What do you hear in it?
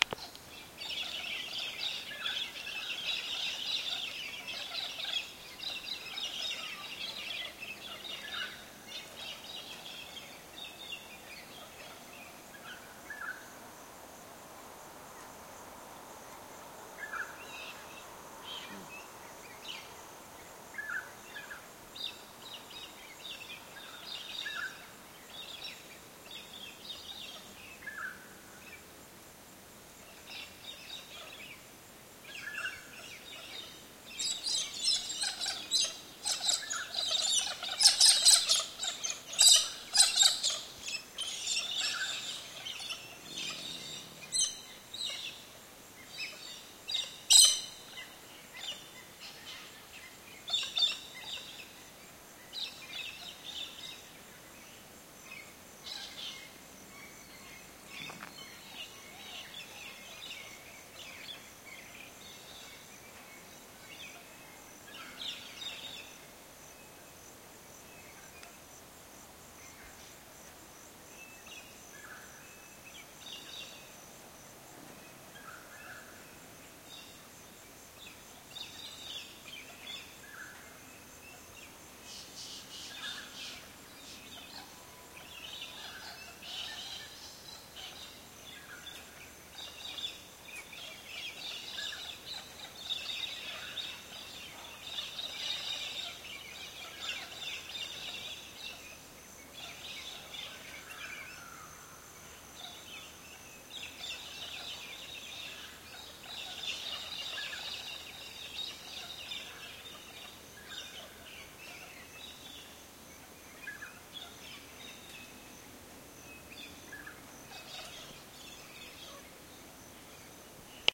Coonabarabran Rural Atmos 2
Rural atmospheric recording. Birds, insects. Low intensity with a few bird peaks. Recorded on LS10
atmospheric, australia, birds, countryside, field-recording, insects, rural